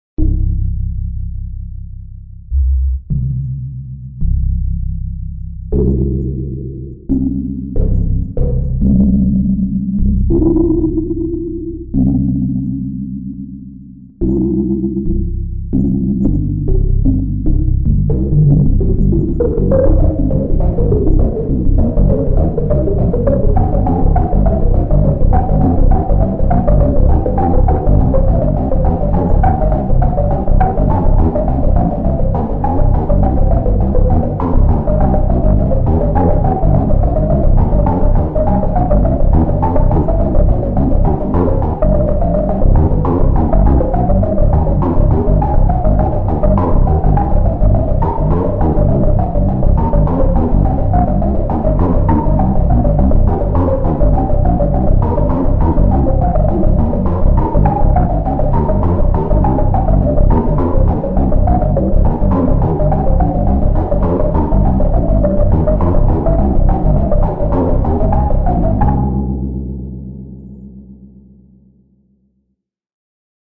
This was recorded in the cave of a group of monsters in Mars ;)
(sound created using Modal sound synthesis; recorded in Sony Sound Forge 10)